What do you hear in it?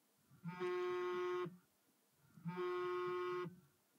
Cell phone vibrate, in bag backpack
iPhone 6, vibrating in a backpack
backpack, bag, cell, iPhone, mobile, phone, pocket, purse, vibrate, vibrating